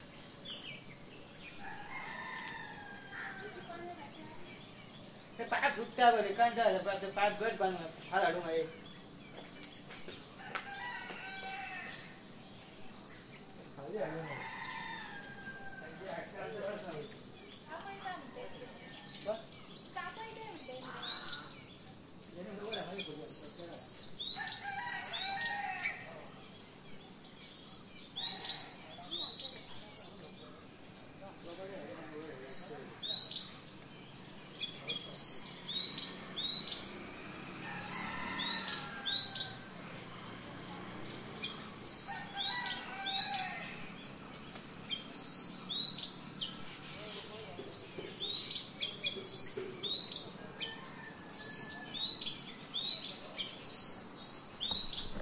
Morning In Palyem (North Goa, India)
Sounds of morning village (Paylem, North Goa, India). Locals start their working day in the garden and discuss their affairs . Recorded with the balcony of the house in the jungle on the Zoom H2
goa, conversations, india, Indians, birds, village, field, jungles, native